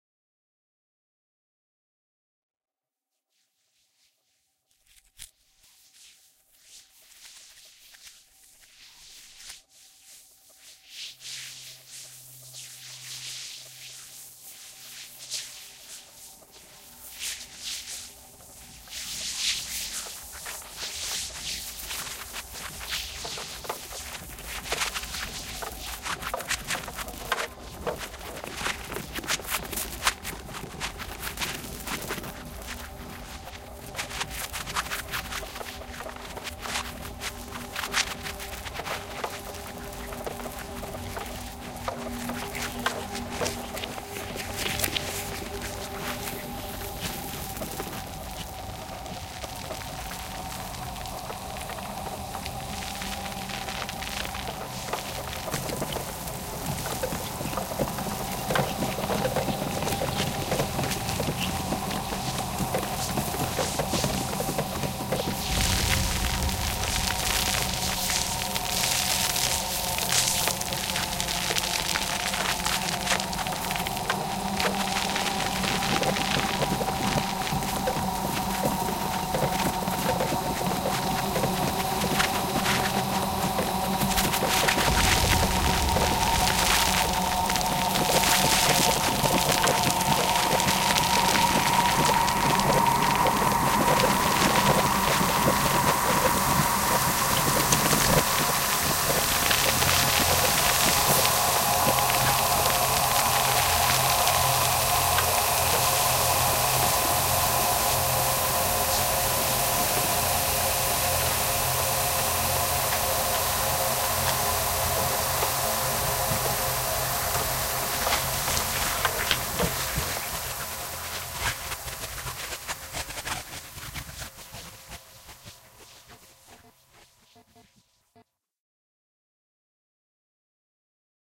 a sound model aims at simulating a conflagration, the inner microsound world of wood objects and vegetation while burning up in the fire and flames. main sound sources were processed microphone recordings, pulse-wave oscillators and filtered noise. i used a dynamic mic to record various objects such as aluminium foil when rubbed against the microphone, paper wrinkles and stone crackles. these went through a bank of modulated filters, distortion, ring modulation, granular processing and some high EQ tunings. different results from the processing were selected and layered on top of each other. envelopes were applied per each layer.
a soundscape layer (in the background) was basically created with bandpass-filtered white noise, freq-modulated sinewaves and chorusing oscillators. these went through a bank of fixed frequency resonators and multiplied with envelopes.
synthesis and processing were done in PD, additional editing/tweaking in Peak and Cubase.
competition, field-recording, fire, noise, processed, sound-design